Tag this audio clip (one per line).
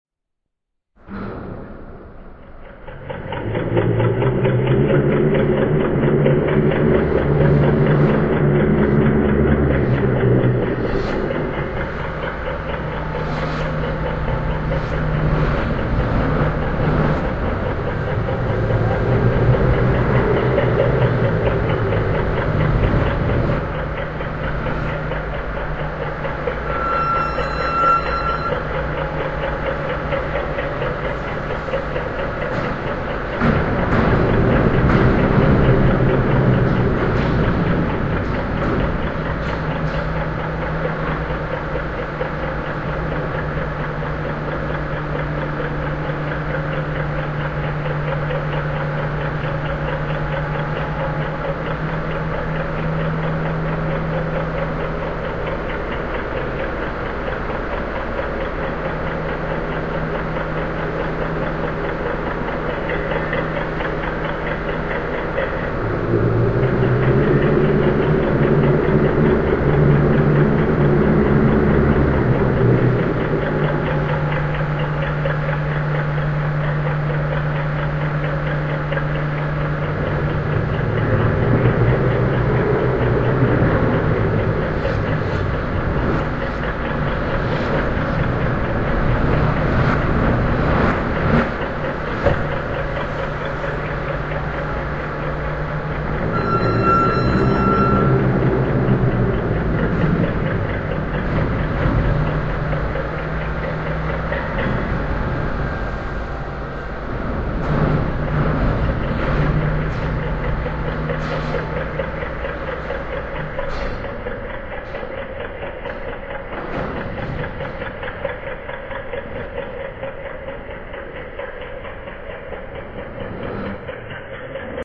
industrial
deep
noise
drone